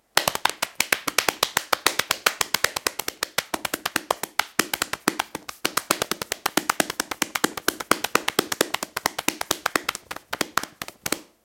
Cartoon Running Footsteps
Footsteps of a running cartoon character. Extremely basic attempt by just clapping with my hands on my legs.
footsteps, foley, running, clap, cartoon